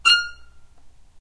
violin spiccato F5
violin, spiccato